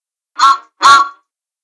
Helms Bakery Truck Whistle sound effects